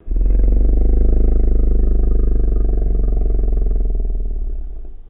A long monster roar.